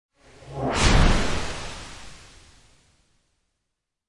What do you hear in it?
A swift swooshy sound